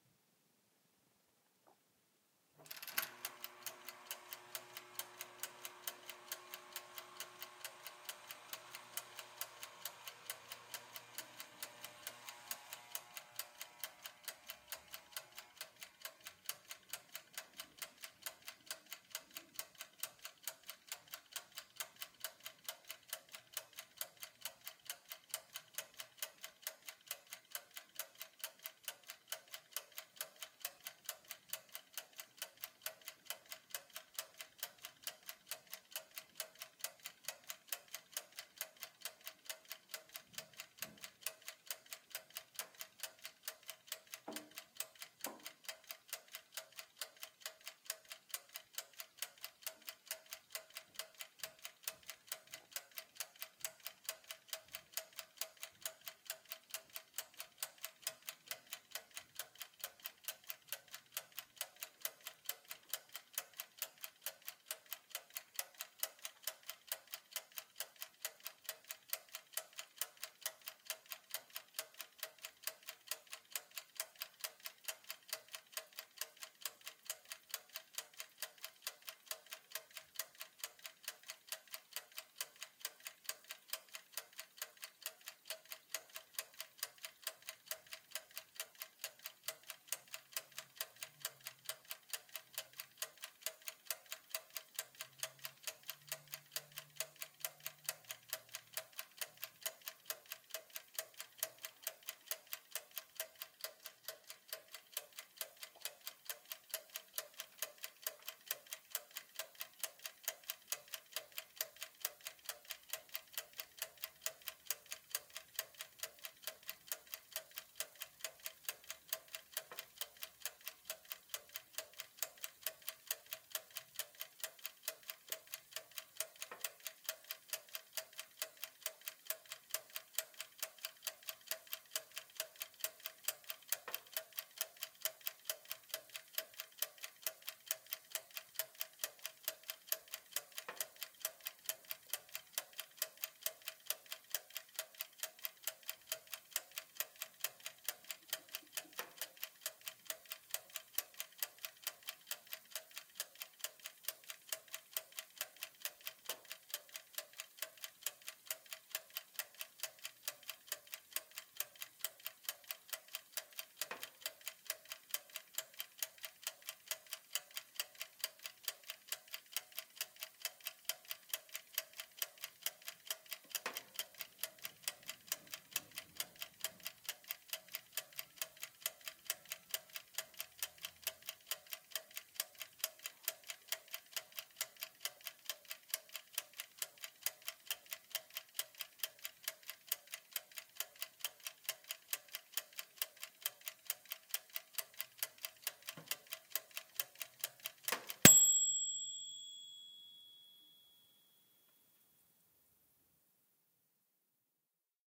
Toaster oven, ticking timer and bell
setting a toaster timer, ticking, and a end-cycle bell
toaster, ding, ticking, bell, cooking, oven, tick, kitchen, timer